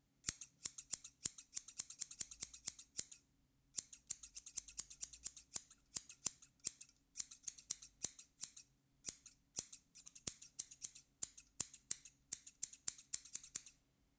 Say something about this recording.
Cutting the air by opening and closing a pair of household scissors. Vivanco EM34 Marantz PMD 671.